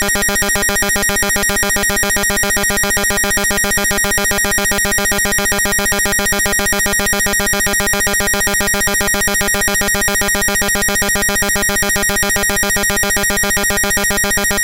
A cheap 8-bit like siren with a cartoon feel. Made on a Roland System100 vintage modular synth.